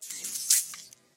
Recording of a squishy sound made with the mouth and edited to fit well into a computer game. A sound for stepping on a dead monster. This is one of four alternating sounds. Recorded with a Sony PCM M-10 for the Global Game Jam 2015.
game, effect, squish, sfx, computer-game, video-game